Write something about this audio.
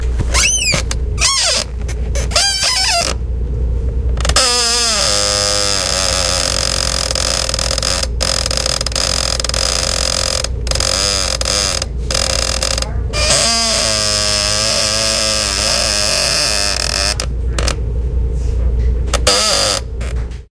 squeaky chair octave whale
An office chair squeaking spontaneously into a Sony microphone and a DS-40 recorder in a small-medium office early in the morning.